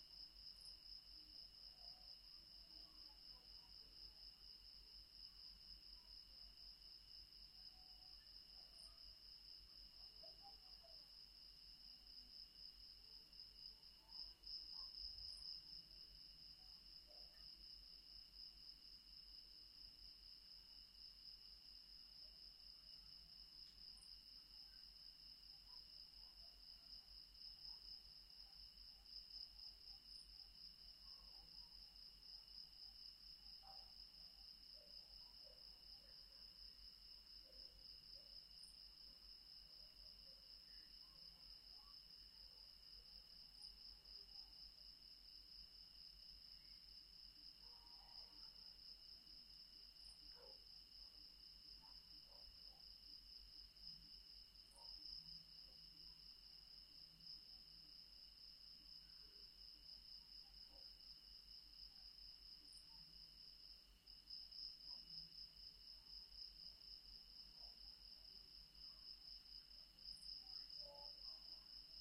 Nieu-Bethesda (Karoo Ambience)
Insects and other night creatures in the karoo in Nieu-Bethesda, South Africa.